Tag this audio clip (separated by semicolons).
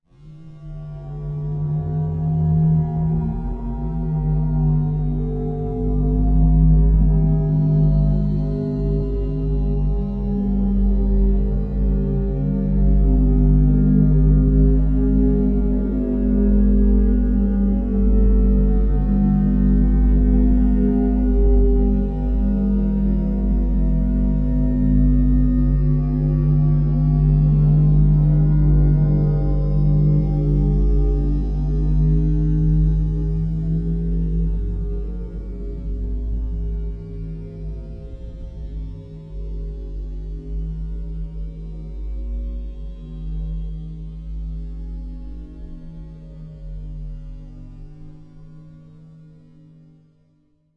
nickel; processed; fx; abstract; metal; time-stretched; resonance; rubbed